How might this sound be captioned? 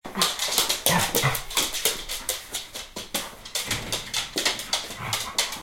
Dog walks
Theres a dog walking